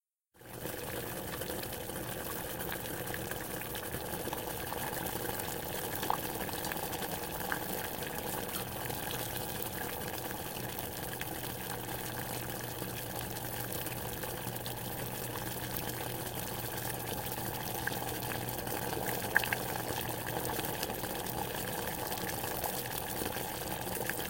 Boiling Water
The sound of water boiling in a pot over a stove. Recorded for my sound design class using a Samsung Galaxy s7 internal microphone.
water, bubbling, boiling